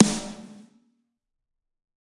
Snare Of God Drier 016
realistic, drumset, set